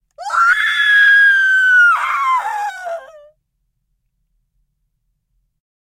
A scream by Annalisa Loeffler. Recorded with Oktava 012 into M-Audio preamp. A bit of overload on the mic capsule.
fear, female, horror, human, scream, vocal, voice, woman